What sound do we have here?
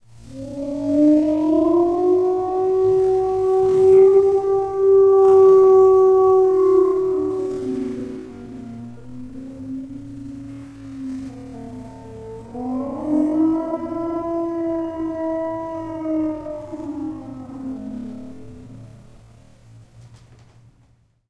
Boris Extended Moan
Our Alaskan Malamute puppy, Boris, recorded inside with a Zoom H2. He is apt to moan in the morning when my wife leaves.